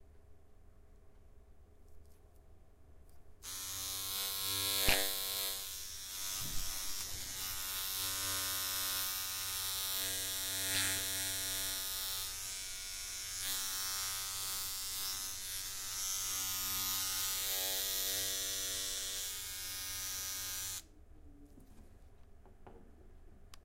SonicSnap GPSUK electric toothbrush
The buzz of an electric toothbrush
Buzz, Toothbrush